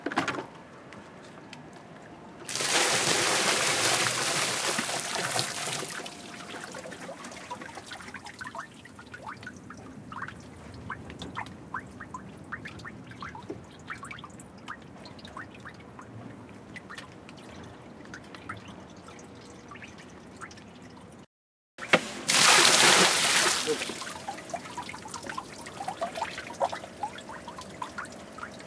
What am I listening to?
water pour dump from bucket onto street and into drain
drain, water, pour, from, into, bucket, dump, onto, street